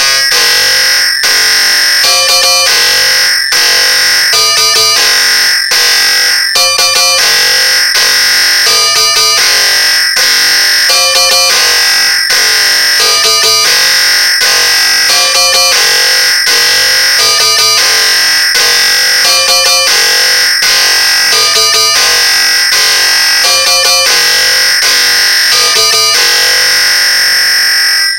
Dismusical membranic audionervonic nonpleasural psychotherapy
dissonance, creepy, psycho